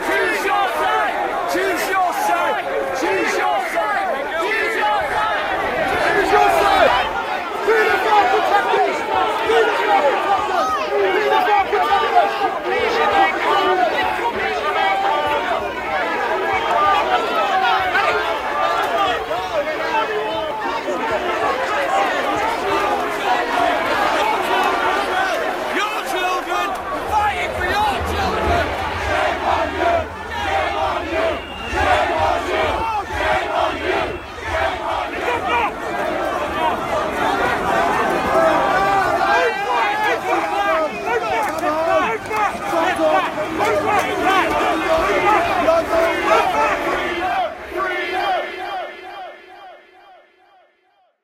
Recorded with a brandless voice recorder & edited the best parts together.
protest, shouting, riot, corona, fieldrecording, UK, covid1984, political, lockdown, demonstration